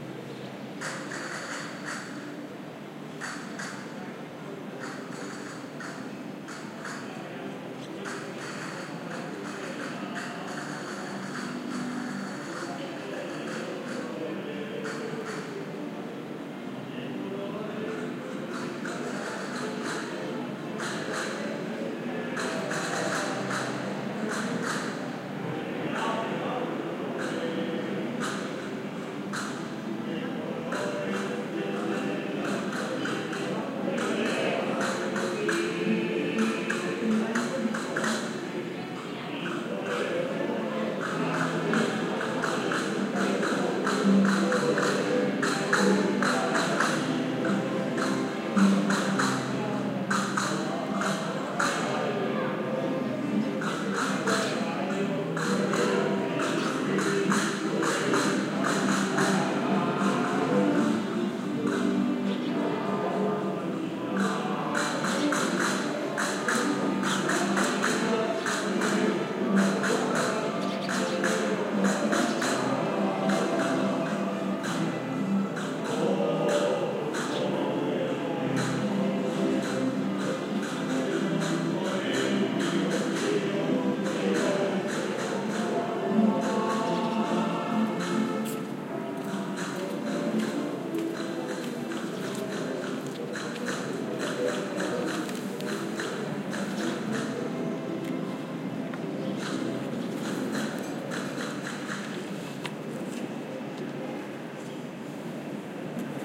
group of people singing accompanied with castanets and mandolins, as they passed along a narrow street in old-town Seville. Some bird chirps, voices, church bells and city rumble in background. Recorded from my balcony using an Audiotechnica BP4025 stereo mic (perpendicular to the street's long axis), Shure FP24 preamp, Olympus LS10 recorder
ambiance, castanet, field-recording, folklore, mandoline, seville, south-spain, spanish, voice